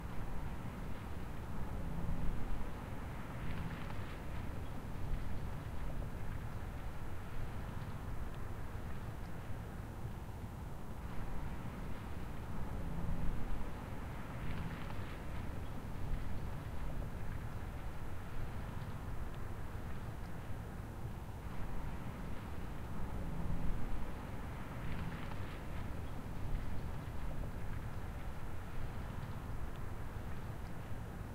SUBURB AMBIENCE OUTSIDE 01
A quiet suburb outside in the fall recorded with a Tascam DR-40
outside, suburb